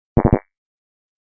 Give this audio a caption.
Advancing Quicker Alert Confirmation Sound
UI sound effect. On an ongoing basis more will be added here
And I'll batch upload here every so often.